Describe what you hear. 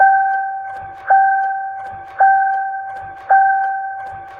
Eerie Bell Loop
Some kind of bell based on a car's startup sound, for use in a videogame as a notification kind of sound.
blink, indication, warning, weird, bell, creepy, indicator, loop, notification, blinking